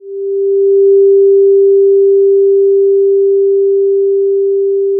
marion herrbach01
description de base: son généré sur Audacity. Onde sinusoide. 350Hz. fondu en ouverture.
Typologie: continu tonique
morphologie:
- masse: son seul tonique
- timbre harmonique: son terne, mou, sonorité basse
- grain: son lisse
- allure: son continu
- dynamique: attaque douce (fondu en ouverture) puis régulier.
-profil mélodique: son glissant, continu comme un sifflement.